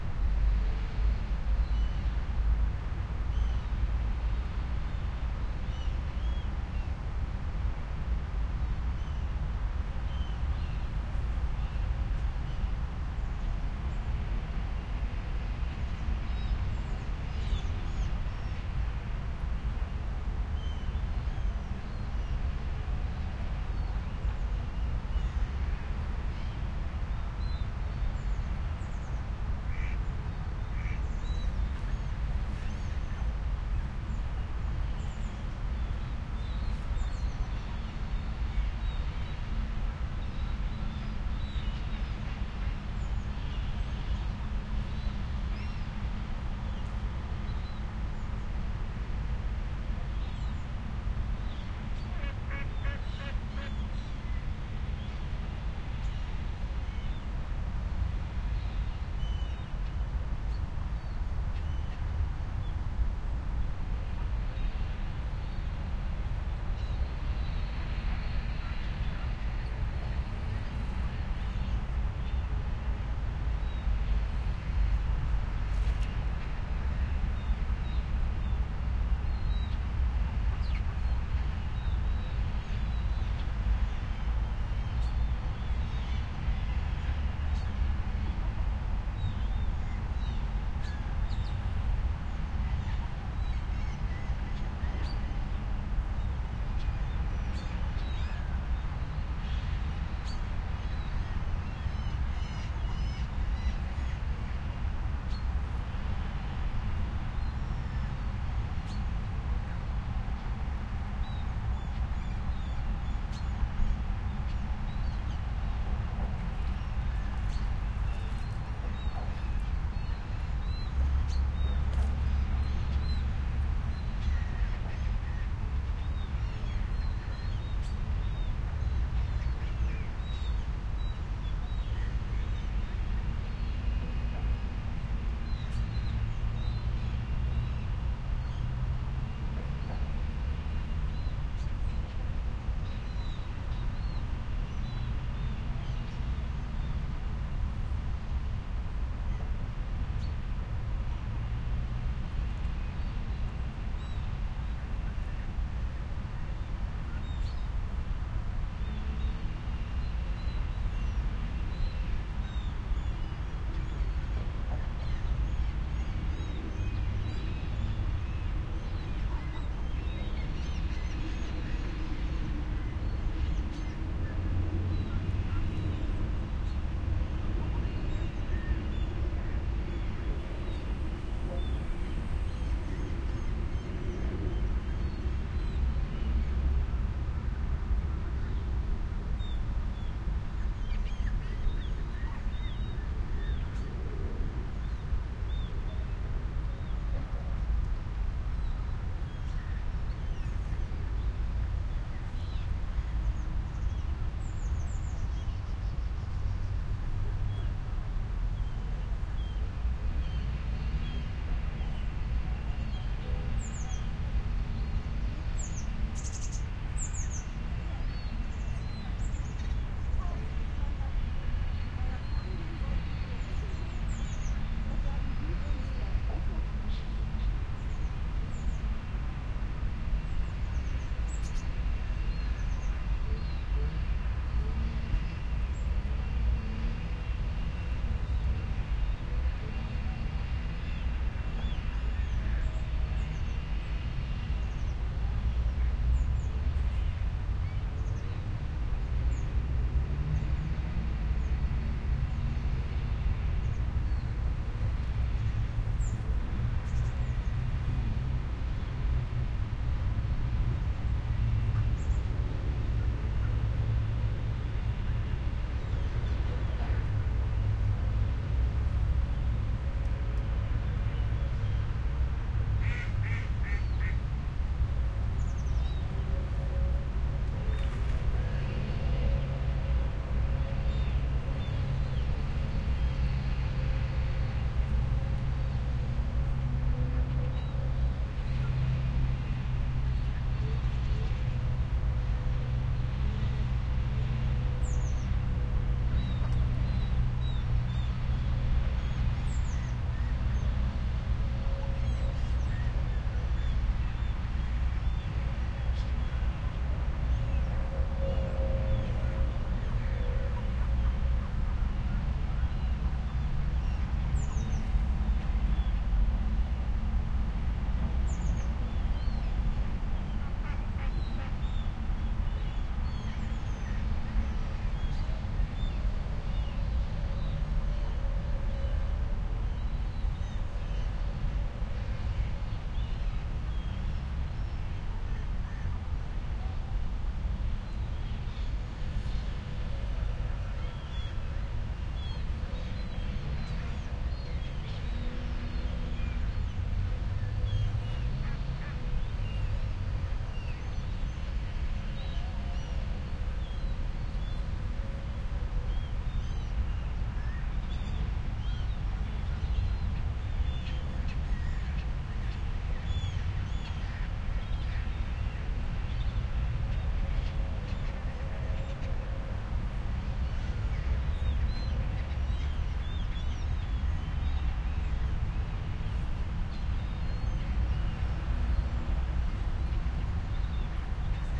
river Weser at Hamelin
Hamelin is a town on the river Weser in Lower Saxony, Germany. The town is famous for the folk tale of the Pied Piper of Hamelin, a medieval story that tells of a tragedy that befell the town in the thirteenth century. Although you don´t hear the Piep Piper, you can hear the river and very umromantic: traffic.
EM172 microphones into PCM M10.